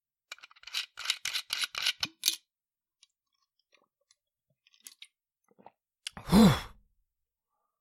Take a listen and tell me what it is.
Swig From Flask With Breath
A swig from a metal flask with a drink and a breath from the "spirit"
gulp, flask, metal, drink, swig, unscrew